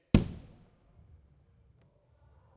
Trash Can Kick in Pool
record, timbale, trash, home